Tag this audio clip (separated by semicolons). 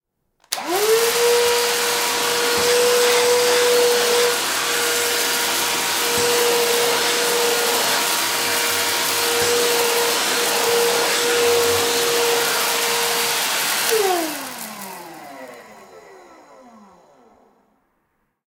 vacuuming; cleaning; garbage; container; suction; sweep; cleaner; hoover; vacuum; sweeping